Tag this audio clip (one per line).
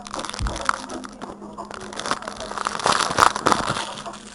candy
crinkle
opens
wrapper